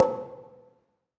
Recordings of different percussive sounds from abandoned small wave power plant. Tascam DR-100.
metal, fx, hit, field-recording, ambient, percussion, industrial, drum